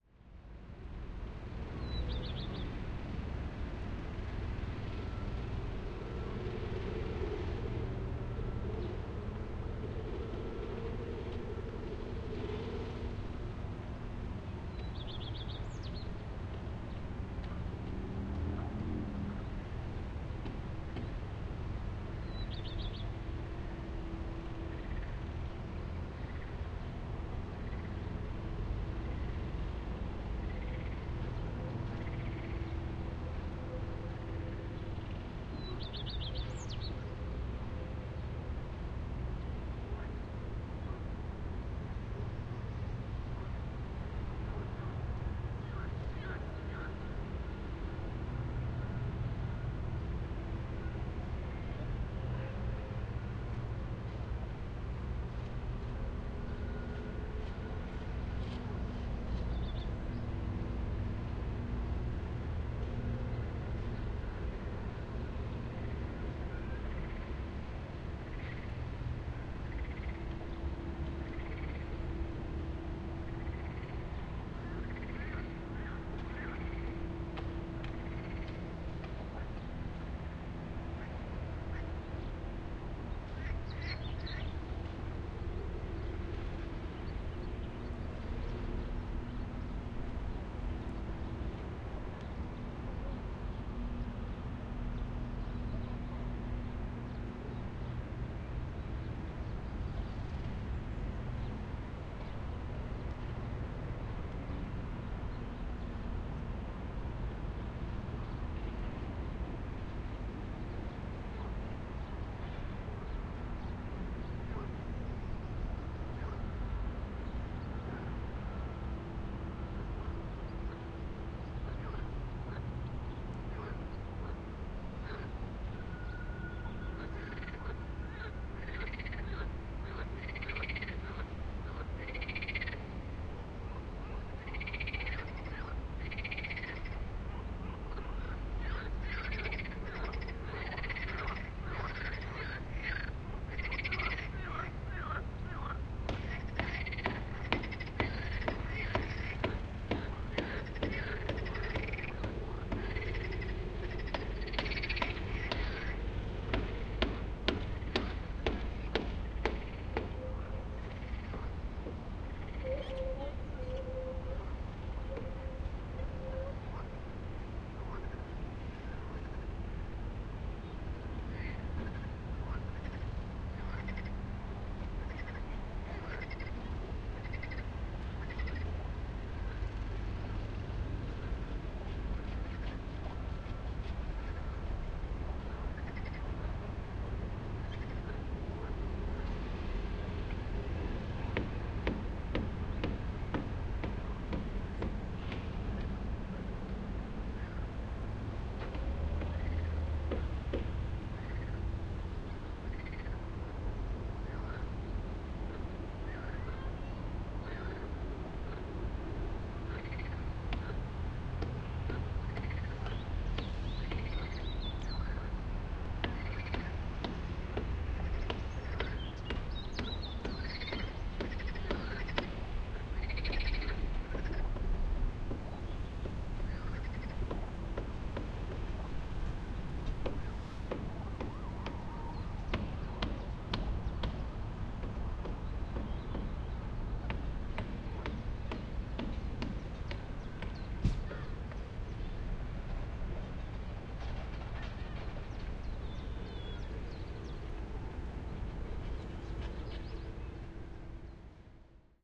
spring city center 080513
08.05.2013: Ostrow Tumski in Poznan (POland). About 13.00. Ambience of a sunny day in the center of Poznan: birds, frogs, passing by cars, traffic noise.
zoom h4n woith internal mics
traffic, birds, spring, poland, cars, frogs, center, city, fieldrecording, poznan